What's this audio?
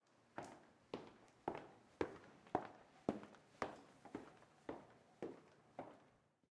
Male dress shoes heavy walk grows distant
Male walking in dress shoes. Recorded with an H4n recorder in my dorm room.
linoleum, footsteps, dress, shoes, male